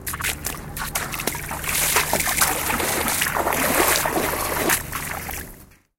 A guy walking on (very) wet ground
rotterdam-area, waterwalker